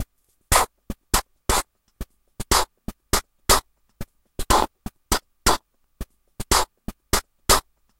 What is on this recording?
Beatbox 01 Loop 014a CracklingBeat@120bpm
Beatboxing recorded with a cheap webmic in Ableton Live and edited with Audacity.
The webmic was so noisy and was picking up he sounds from the laptop fan that I decided to use a noise gate.
This is a clap pattern with no bass. USeful to overlay on other rhythms that already contain a kick.
Perhaps sounds a bit too crispy and strong in the high frequencies, due to being recorded with a cheap webmic.
noise-gate, Dare-19, claps, loop, beatbox, 120-bpm, rhythm, crispy, clap